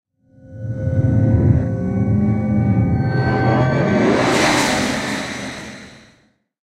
Sci-fi sound effects (26)
Sound design elements.
Effects recorded from the field of the ZOOM H6 recorder,and microphone Oktava MK-012-01,and then processed.
Sound composed of several layers, and then processed with different effect plug-ins in: Cakewalk by BandLab, Pro Tools First.
I use software to produce effects:
Ableton Live
VCV RACK 0.6.0
Pro Tools First
abstract, atmosphere, background, cinematic, dark, destruction, drone, futuristic, game, glitch, hit, horror, impact, metal, metalic, morph, moves, noise, opening, rise, scary, Sci-fi, stinger, transformation, transformer, transition, woosh